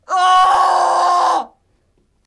Male screaming (pain)